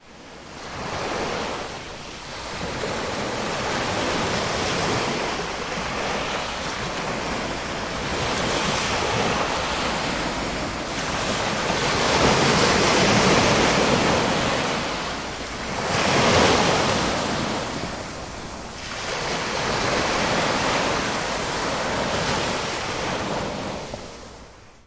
This is the sound produced waves in Masnou beach.
It has been recorded using a Zoom H2.
barcelona, beach, Ocean